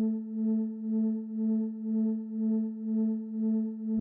002 sub wobble cf100.
Here is a sub bass sample generated in SC
bass, wobble, sample, supercollider, sub